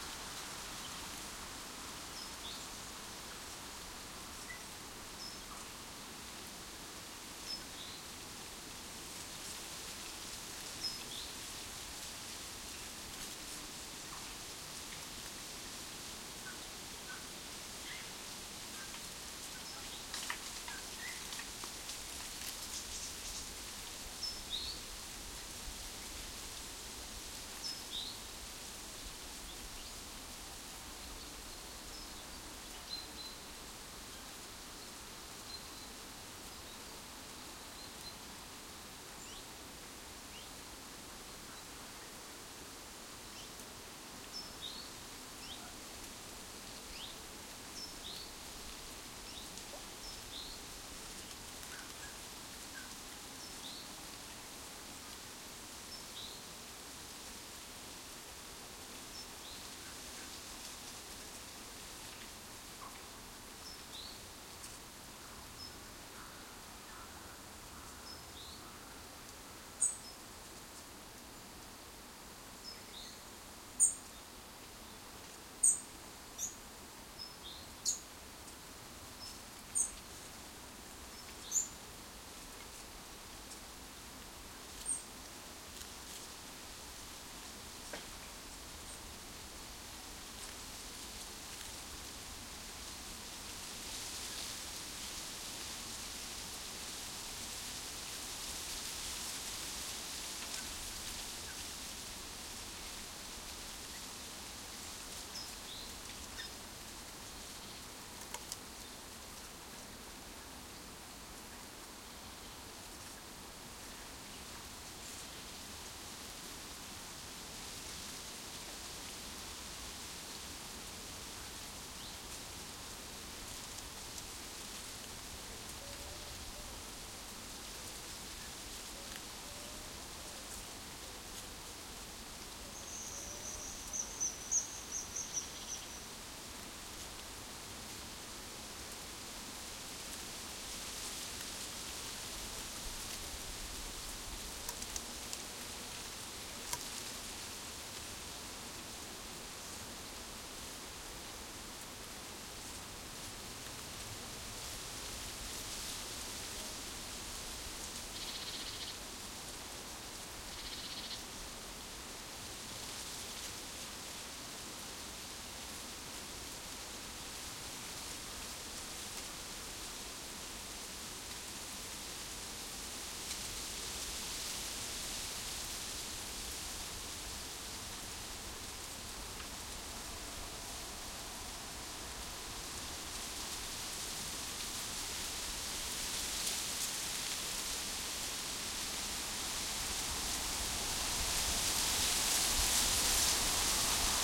Tree Rustle 2
Very windy day with dry leaves rustling in a tree by the river Cher, in Bruere Allichamps, France. Thick and luscious
Microphones: 2 x DPA 4060 in Stereo